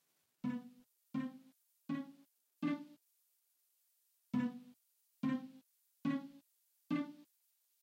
Sitar. Slightly cartoonish.